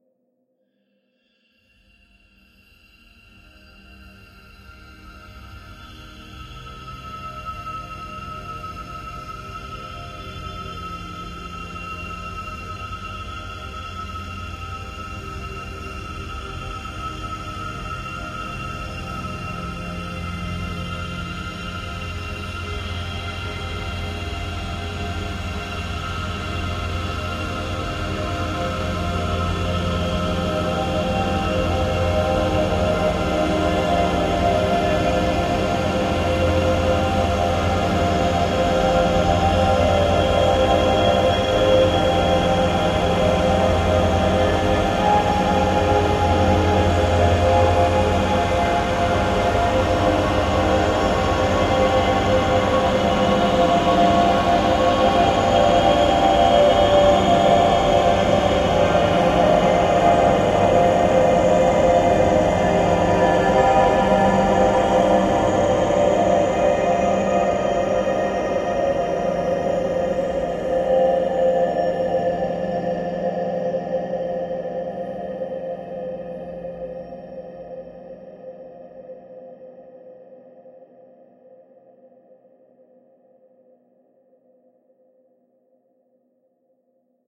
LAYERS 010 - Dreamdrone-96
LAYERS 010 - Dreamdrone is an extensive multisample package containing 108 samples. The numbers are equivalent to chromatic key assignment. The sound of Dreamdrone is already in the name: a long (over 90 seconds!) slowly evolving dreamy ambient drone pad with a lot of movement suitable for lovely background atmospheres that can be played as a PAD sound in your favourite sampler. Think Steve Roach or Vidna Obmana and you know what this multisample sounds like. It was created using NI Kontakt 4 within Cubase 5 and a lot of convolution (Voxengo's Pristine Space is my favourite) as well as some reverb from u-he: Uhbik-A. To maximise the sound excellent mastering plugins were used from Roger Nichols: Finis & D4.
soundscape, artificial, multisample, evolving, smooth, ambient, dreamy, drone, pad